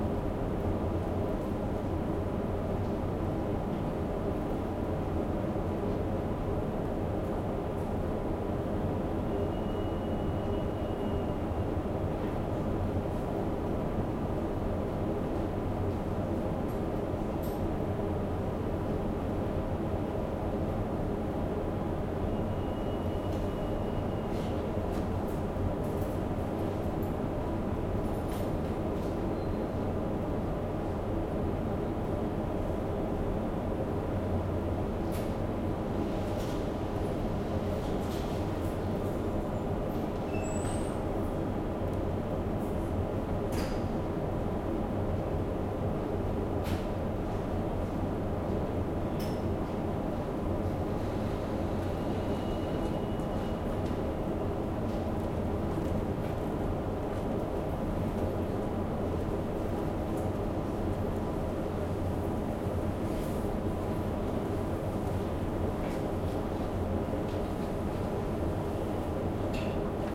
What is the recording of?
170715 StLFerry Galley 0530 F
Early morning in the galley of a Baltic Sea car ferry bound from Rostock/Germany to Trelleborg/Sweden. It is 5.30, the ship is approaching it's destination harbor. Few people are around, making small, early morning noises virtually drowned out by the hum of the diesels.
Recorded with a Zoom H2N. These are the FRONT channels of a 4ch surround recording. Mics set to 90° dispersion.
people, field-recording, galley, cruise, ferry, ship, drone, Baltic, large, surround, interior, maritime, ocean